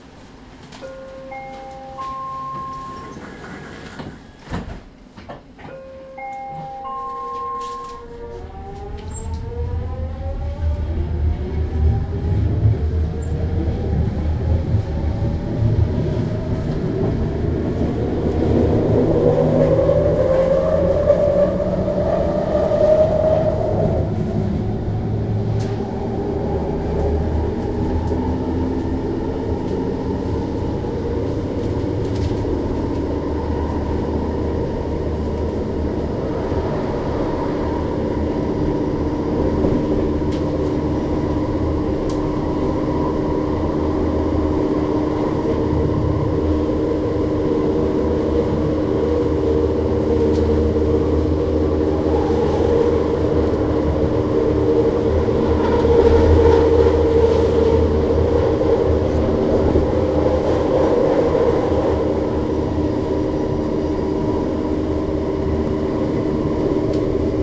Skytrain Acceleration FS
An electric transit train with a linear induction motor accelerating out of a station. Recorded from inside the train.
acceleration, electric, motor, transit-train